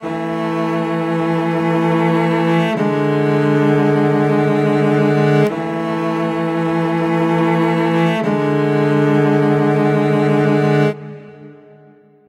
cellos two chords 88 bpm
Two cellos playing two chords twice. Try 88 or 87 bpm. - PS. Uuups, I left a clip there in the middle! Uploaded the wrong file, actually. So only half of it is usable, sorry.
cello, music